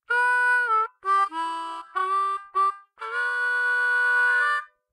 Harmonica Rift Key Of C 14
This is a recording of a rift I was practicing and captured on mic.
Key, C, Rift, Harmonica